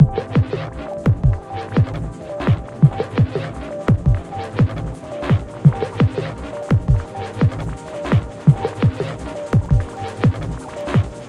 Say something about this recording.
This one is based on a few Samples i own from a Samplepack. By using a variety of different effect engines a complete new piece was created.
melody, dnb, 170